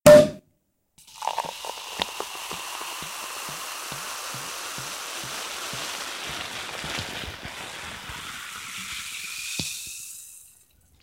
glass of cremant wine